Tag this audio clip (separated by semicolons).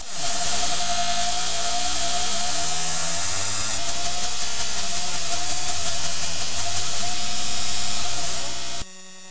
loop; sound-design; 2-bar; pitched; noise; industrial; processed; flutter; electronic